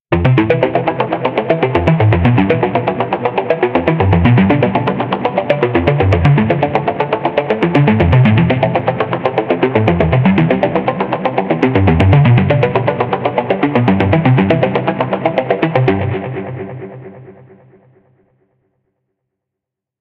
ARP D - var 8
ARPS D - I took a self created sound from the Virtual Korg MS20 VSTi within Cubase, played some chords on a track and used the build in arpeggiator of Cubase 5 to create a nice arpeggio. I used several distortion, delay, reverb and phaser effects to create 9 variations. 8 bar loop with an added 9th and 10th bar for the tail at 4/4 120 BPM. Enjoy!
120bpm, arpeggio, bass, harmonic, melodic, sequence, synth